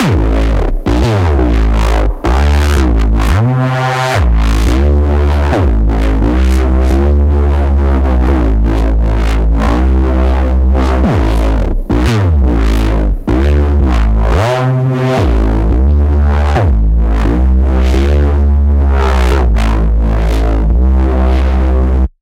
Bass Am 174BPM
NOTE: AUDIO MAY NOT SOUND OF GOOD QUALITY IN PREVIEW
I'd recommend to splice things up a bit by slicing it into pieces & arranging them into something new.
Loop, Synthesis, Drum, Bass, EDM